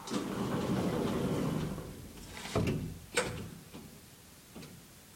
lift doors closing 01
Just the doors closing on a modern lift.
closing, elevator, door, doors